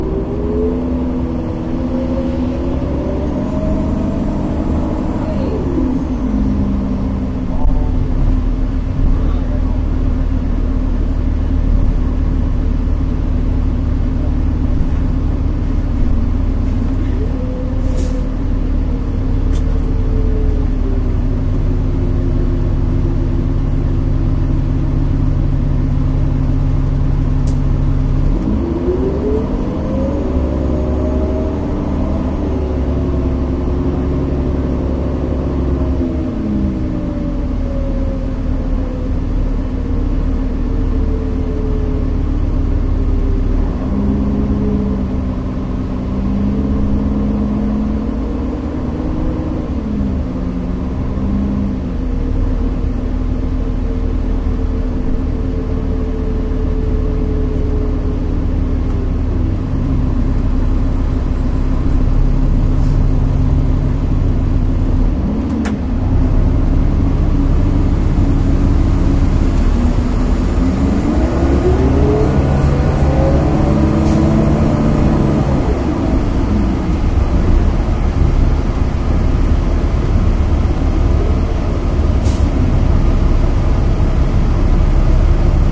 Recorded during a 12 hour work day. Mores bus noises
field-recording, public